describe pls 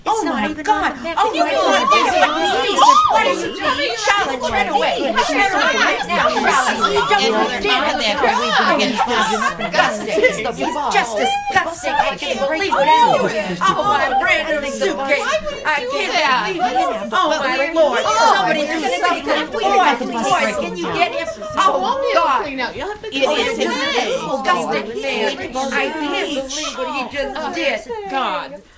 Shrieks and Squeals
Recording of six women chatting excitedly among themselves. Recorded on a portable MiniDisc machine for a theatre production.
Shrieks, Squeals, Excited-Voices